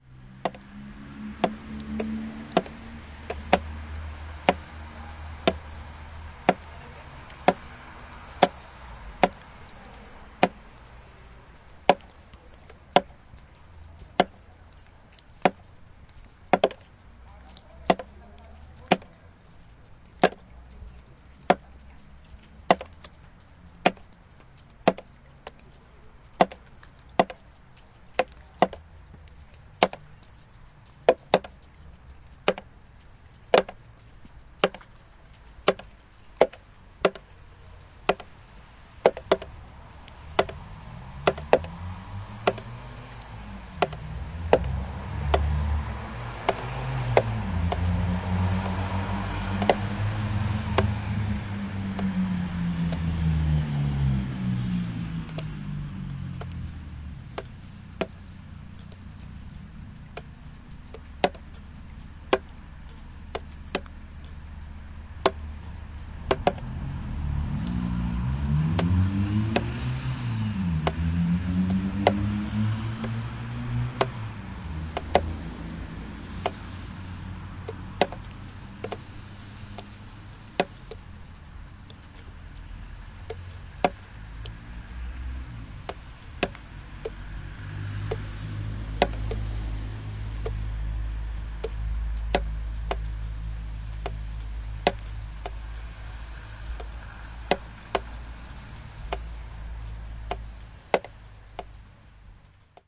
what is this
23.12.11: about 5 p.m. sound of meltwater drops dropping from the roof. Sobieszow in the south-west Poland. recorder: zoom h4n. fade in/out.
drops, fieldrecording, meltwater, trickle, water